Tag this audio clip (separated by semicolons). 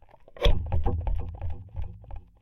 Metal; Ruler; Twang